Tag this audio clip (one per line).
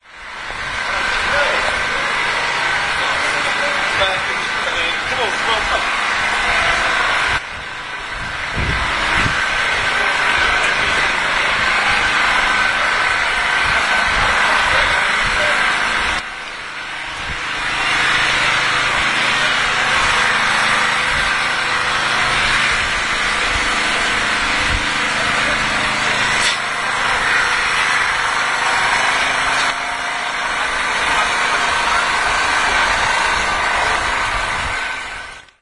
generator
buzz
buzzing
poznan
noise